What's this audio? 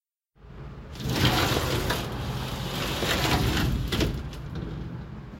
Sliding an old window open.